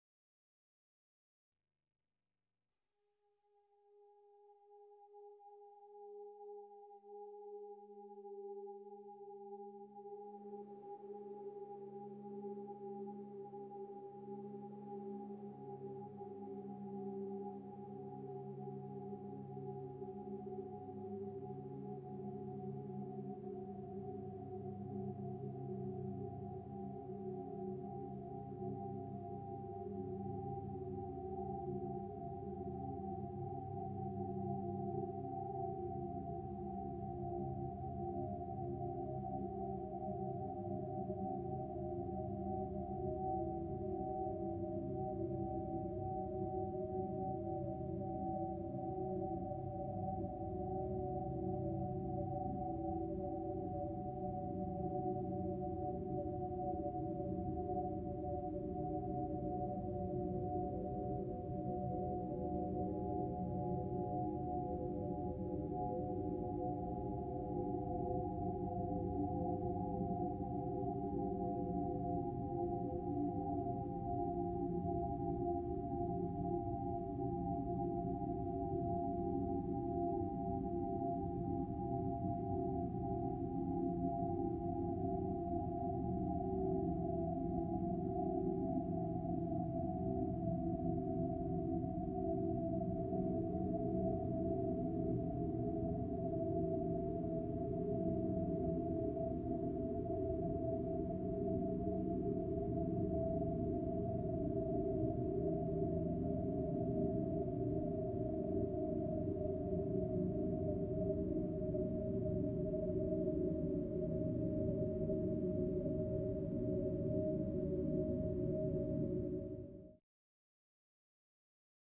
ioscbank in stack2 exp
atmosphere, atmospheric, clustering, dark, drone, falling-pitch, high, oscillator-bank, processed, stack